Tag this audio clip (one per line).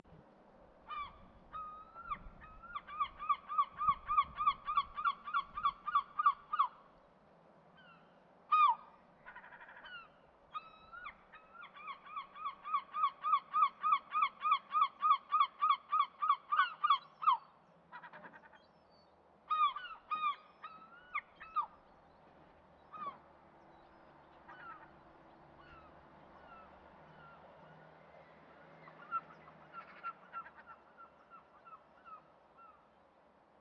bird
gull